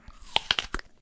Biting Apple #1
Biting an apple Porklash's sound
food, eat